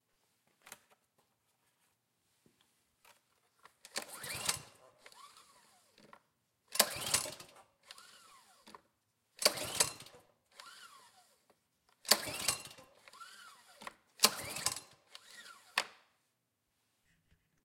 pull-recoil
Mower recoil is pulled 5 times, no start, Tascam DR-40
split, lawn, log, splitter, mower, wood, pull, start, engine, small